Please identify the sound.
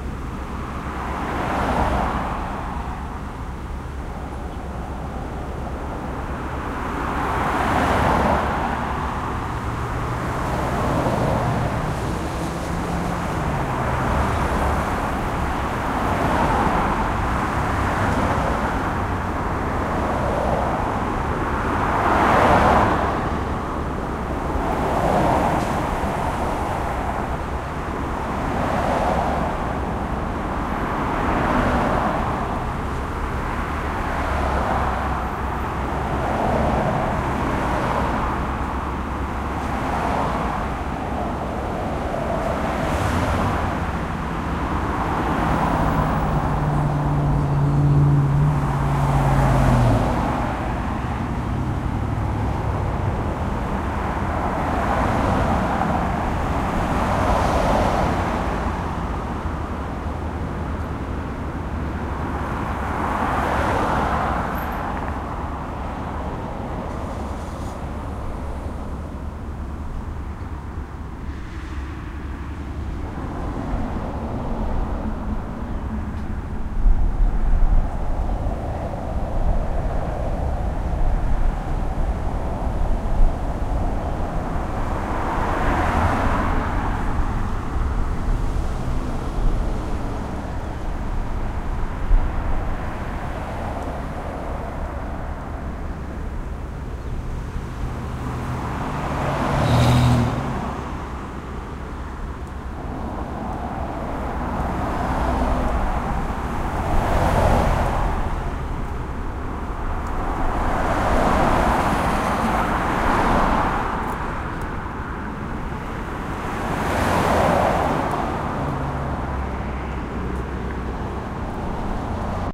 Ben Shewmaker - Traffic on 88th
I recently discovered that my department at the University of Miami has a Tascam DA-P1 DAT recorded and an Audio-Technica AT825 mic that I can check out and use for whatever I want. So I just went out to a busy street, in this case west 88th, and started recording the traffic. But after only 3 minutes, the battery died (which I had just fully charged before I left!), so I'm afraid the battery may be shot.